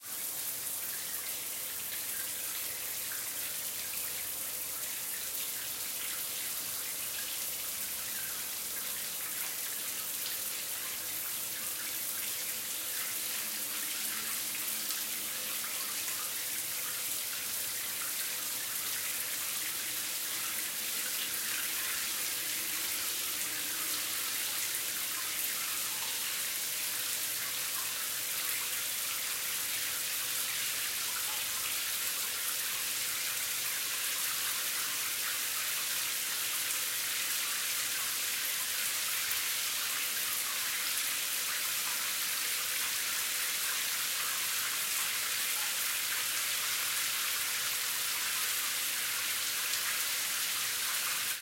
Shower Running Continous
Shower running at medium pressure.
water, bathroom, shower, reverb